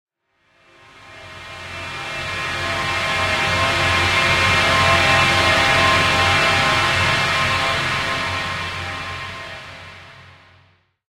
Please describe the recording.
Horny Hobbit
A pad sound with a brassy feel to it.
horns, pad, edison, single-hit